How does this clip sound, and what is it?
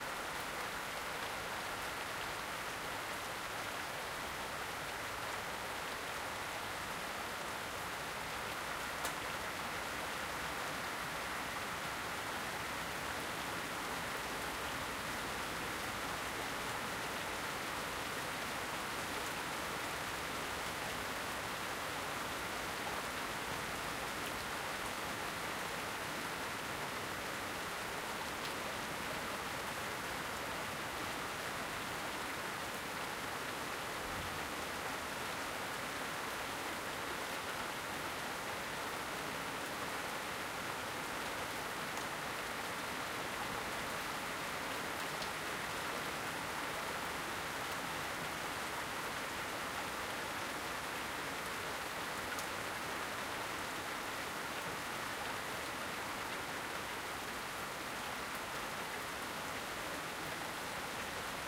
Moderate tropical rain pouring on a house garden. Recorded in Reunion Island on January 2022.
Recorded with : Zoom H1 stereo mic (1st gen)